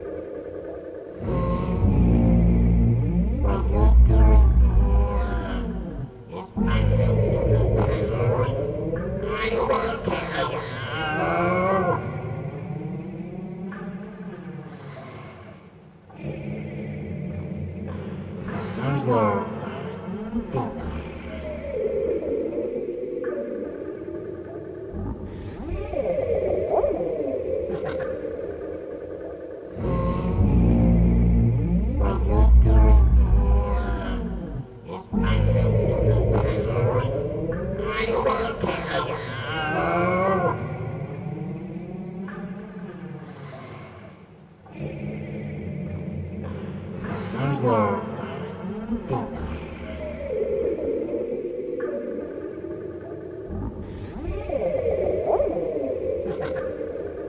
creepy alien voice
Just mucking about with a wireless headset mic plugged into a Yamaha AG Stomp, Digitech Timebender delay and Vox VDL-1 Looping pedal.
Had a tweak of the pitch shifter on the Vox looper and this creepy thing popped out :O)
horror, sound-effect